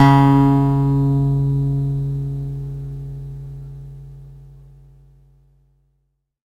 Sampling of my electro acoustic guitar Sherwood SH887 three octaves and five velocity levels
acoustic, multisample